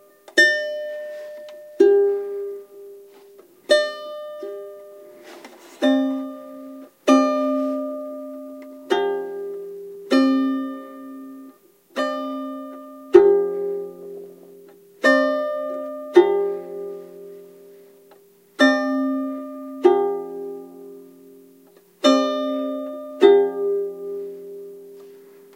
random noises made with a violin, Sennheiser MKH60 + MKH30, Shure FP24 preamp, Sony M-10 recorder. Decoded to mid-side stereo with free Voxengo VST plugin.